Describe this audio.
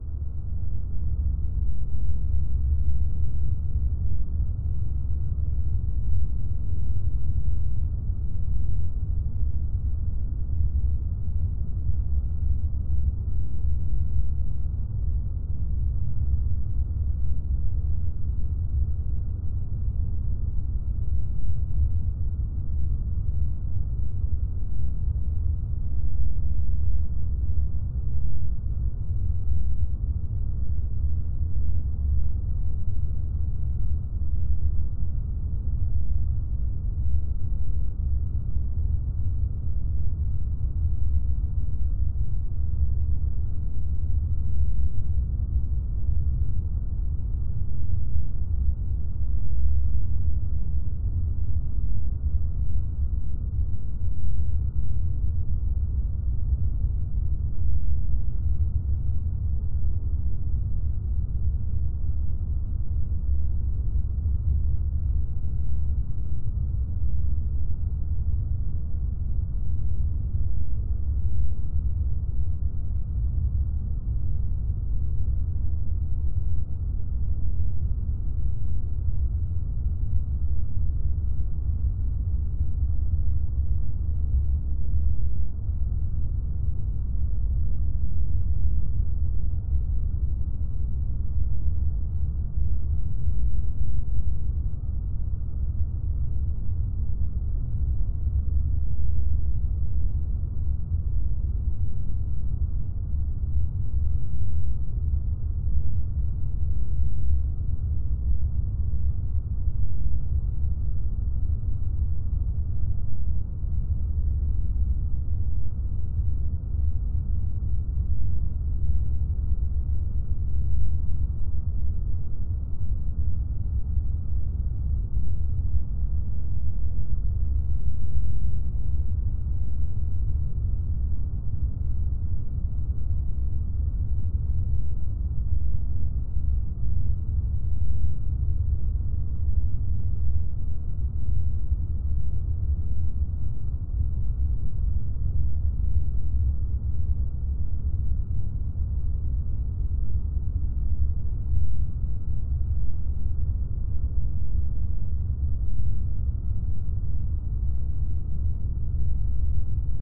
Background spaceship sound created entirely in Adobe Audition